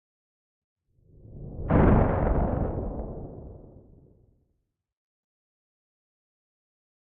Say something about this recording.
Synthesized Thunder Slow 06
Synthesized using a Korg microKorg